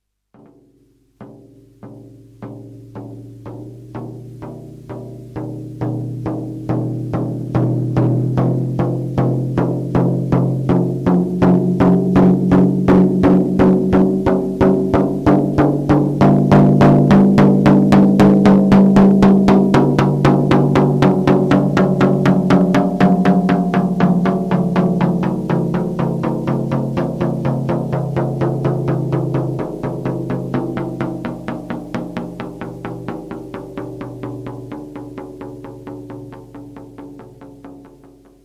Just making fish music with three drums